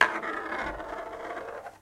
essen mysounds manei
one marble rolling on the table
Essen, germany, mysound, object